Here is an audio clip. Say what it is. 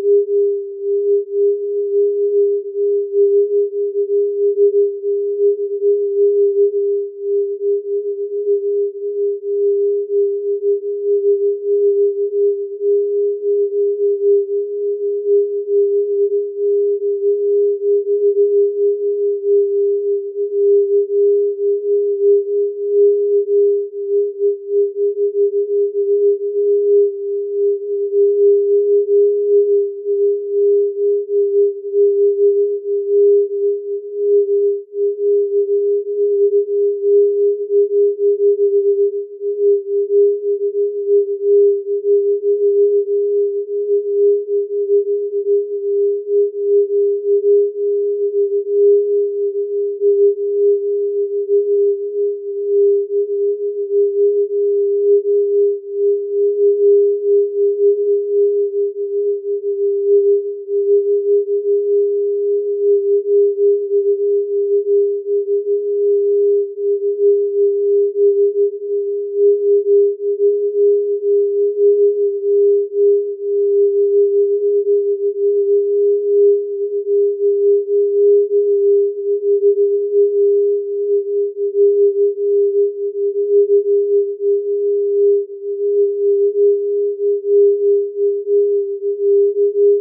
digital flame
testing new generator. randomly fluctuating pulsation of a tone
drone, experimental, generator